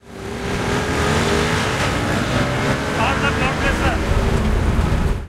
The sound of a rickshaw in Delhi, unforgettable...
and some musical words from the driver...
delhi, rickshaw, sound